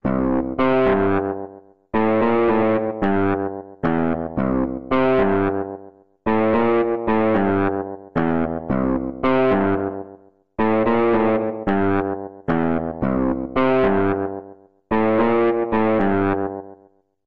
fingerbass loop simple 111bpm
why does this remind me of gary numan?
bass, bass-loop, bassloop, distorted